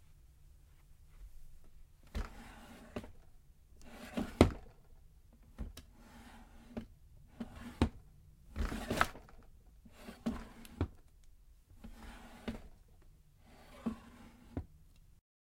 Opening and closing wooden dresser drawers full of trinkets.
closing, drawers, open, wooden